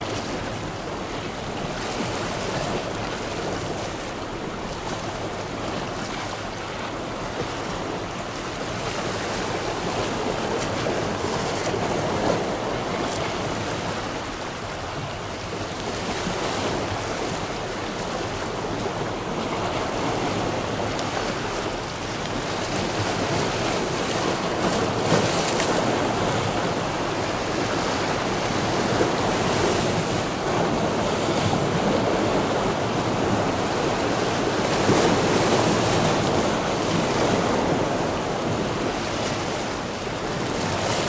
Small waves in rocks. Stereo recording. A Sony Handycam HDR-SR12 has been used. The sound is unprocessed and was recorder in Greece, somewhere in Peloponnese.